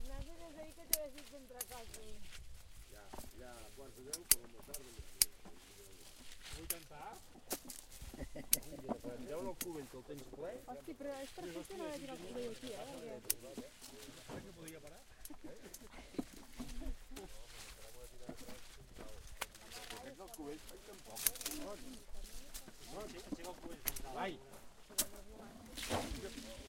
1 vinya collidors
Field recording of people working during the wine harvest in the Penedès area (Barcelona). Recording using a Zoom H4.
pened, outdoors, field, recording, wine-harvest, s